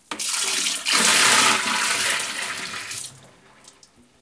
pouring bucket
pouring the bucket